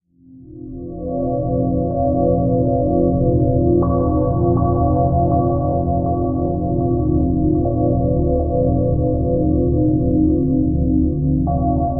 Layered pads for your sampler.Ambient, lounge, downbeat, electronica, chillout.Tempo aprox :90 bpm
chillout, pad, electronica, sampler, downbeat, ambient, lounge, synth, texture, layered